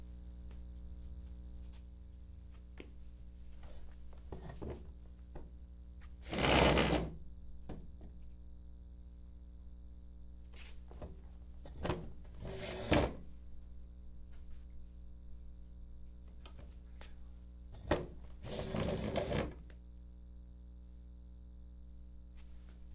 Pulling a steel chair